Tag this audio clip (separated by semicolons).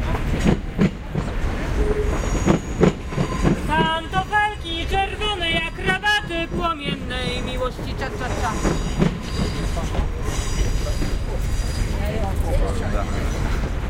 train; ambiance